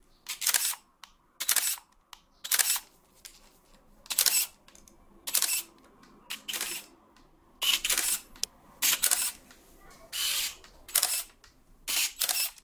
Camera Flashing
The clicking and flashing sound of a camera. Recorded with a Sony IC recorder.
Camera, Camera-sound, Click, Flash